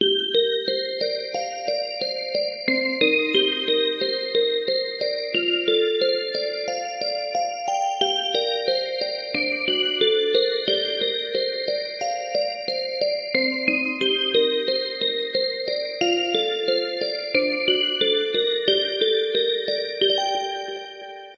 Fairy Tale Synth Bells
I took inspiration from a piece from an old lost anime with no released soundtrack, as far as I know, and tried to replicate it with some variations, I think I did a pretty good job. It's a variation on a basic arp. 90 bpm.
Chords- Gm, Cm, F, Gm, D, Gm, Cm, F, D, Gm.
Eighties, Anime, High, Shimmer, Synth, Vintage, Sine, Gentle, Retro, Shimmering, Romantic, Synthetic, Bell, Bells, Sweet, Arp